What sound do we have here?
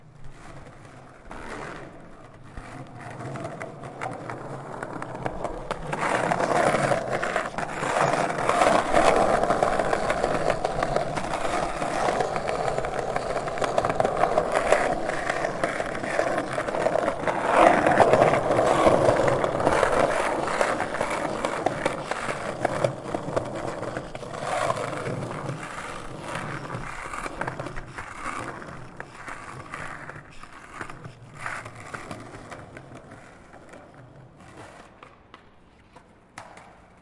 The sound of skate boards that i take for my video project "Scate Girls".
And I never use it. So may be it was made for you guys ))
board, creak, group, hard, many, riding, skate, skateboard, skateboarding, skating, together, wheels, wooden